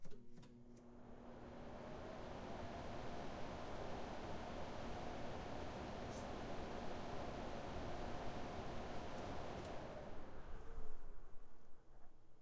aair conditioning hum
hum, conditioning, air